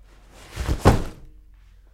bag down 5
Can be used as a body hit possibly.
bag, impact, rucksack, body-hit